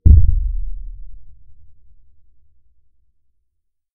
Bass Impact - Ki 01
Bass, Boom, Crash, Deep, Explosion, Hit, Impact